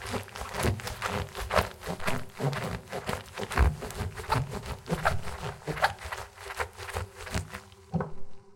Tubbing wet fake leather. Very annoying sound.